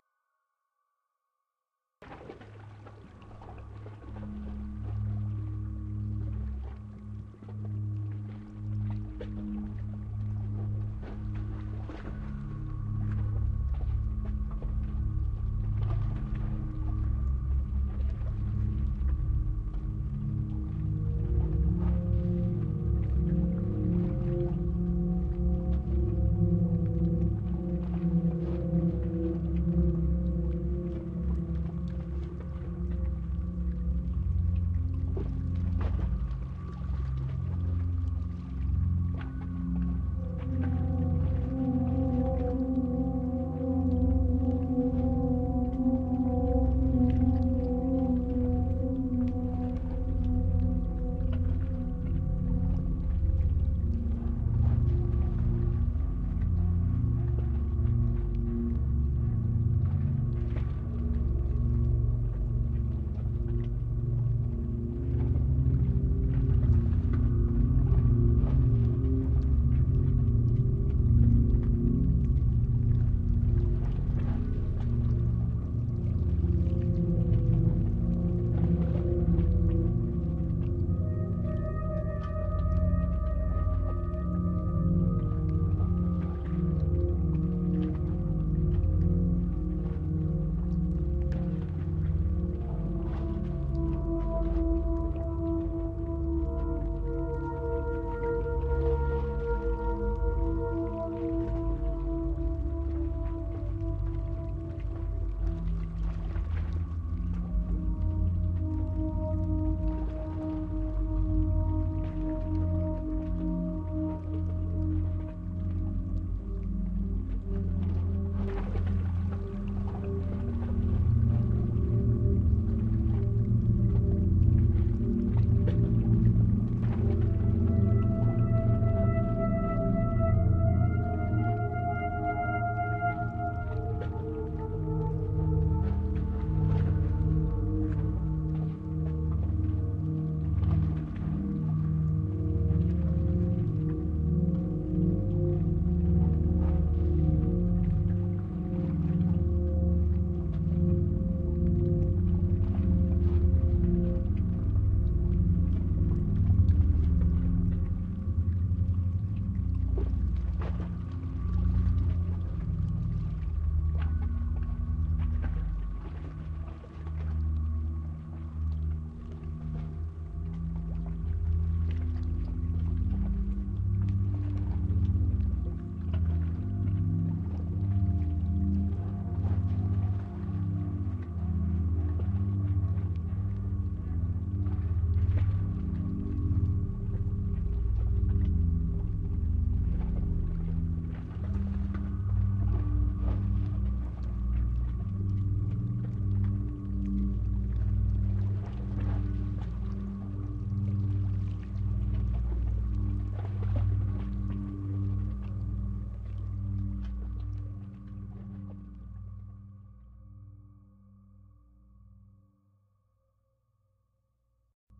A slowly evolving, abstract soundscape, derived from field recordings and resonant, metallic sounds.